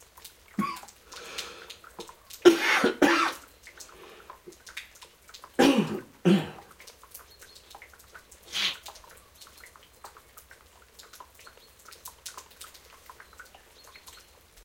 man coughing, noise of raindrops and bird chirps in background. Sennheiser MK60 + MKH30 into Shure FP24 preamp, Olympus LS10 recorder
cold, cough, field-recording, male